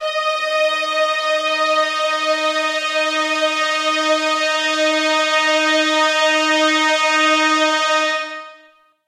Spook Orchestra [Instrument]